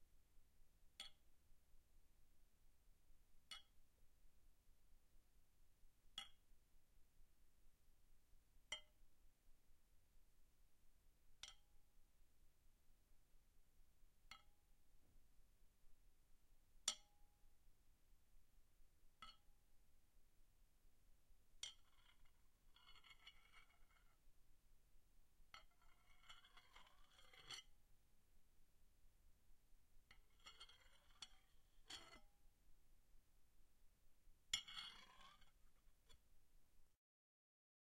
METLImpt Sai Weapon Foley Light, Tap, Gentle
I recorded my Sai to get a variety of metal impacts, tones, rings, clangs and scrapes.
impact, metal, strike